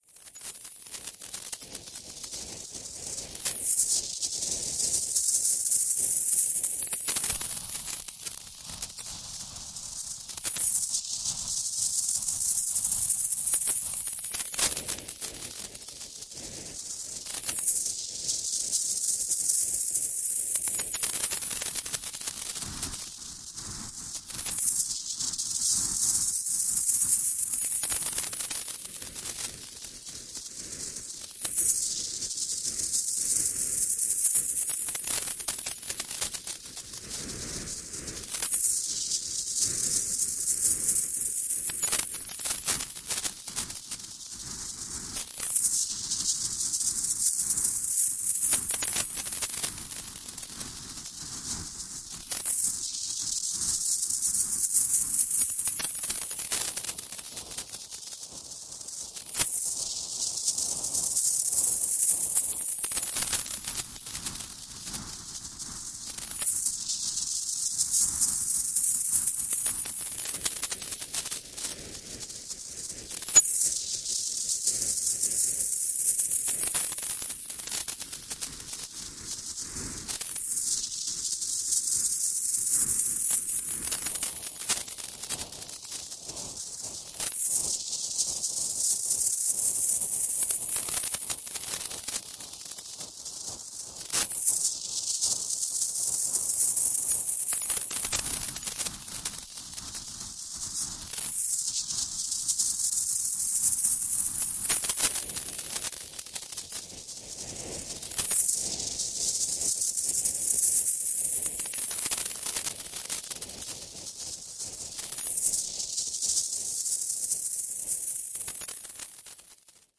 1.This sample is part of the "Noise Garden" sample pack. 2 minutes of pure ambient droning noisescape. Paper snippets in space.